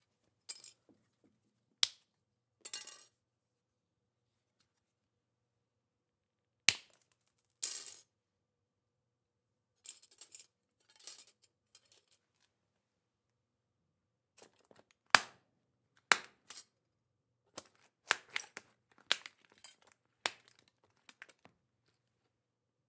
Breaking plastic
Snapping small pieces of plastic by hand. Only effect applied is a 100Hz highpass.